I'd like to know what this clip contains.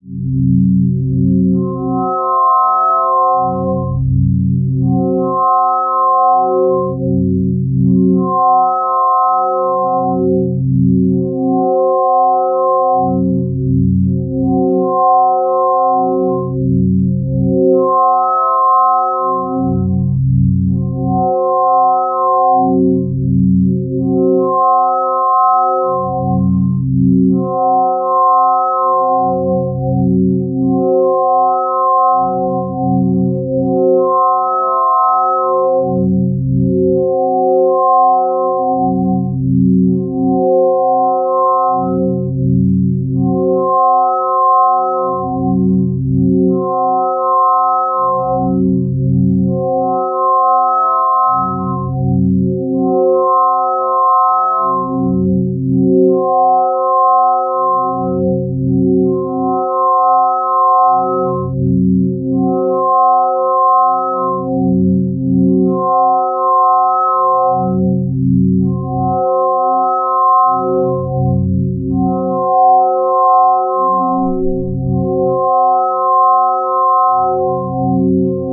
Wobbling synth tone 1
As Described. Made using converted Bitmap images.